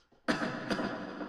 Tos Sound FX
A bored cough in an arena
Bored, Cough, Sarcasm